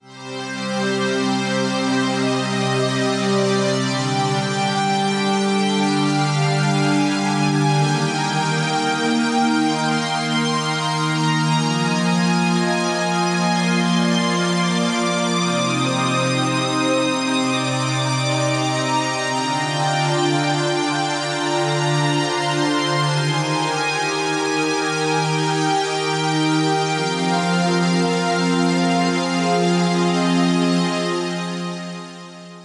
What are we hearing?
Civil Destruction 1(No FX)
techno, melody, strings, pad, progression, trance, synth, sequence, beat